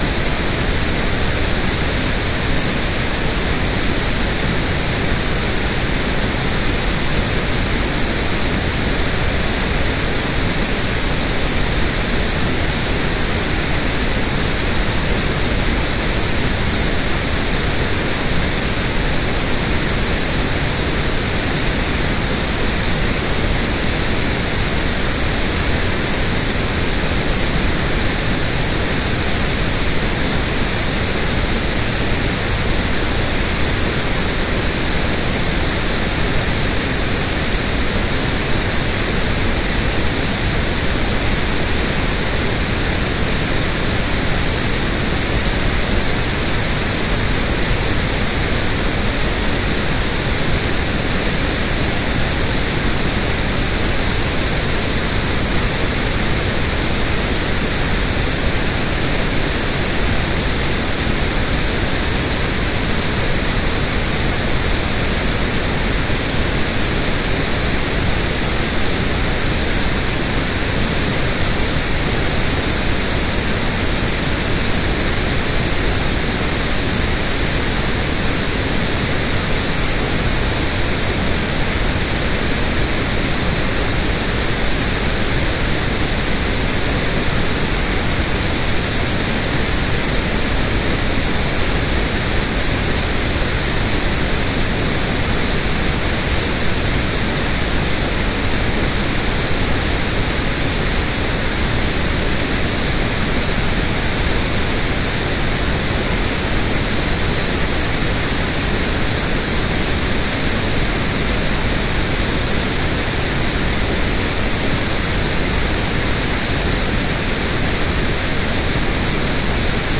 2 minutes of Pink Noise